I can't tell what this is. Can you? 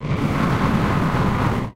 Dark Power Down
A negative effect taking place. A character looses a level. Temporarily or permanently? The player is going to make that distinction in the long run... Created by overprocessing own recording and the Granular Scatter Processor.
Edited with Audacity.
Plaintext:
HTML: